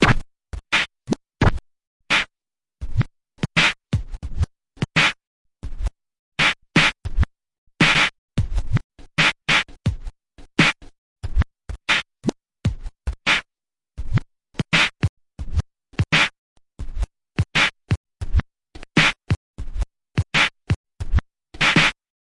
Scratching Kick n Snare @ 86BPM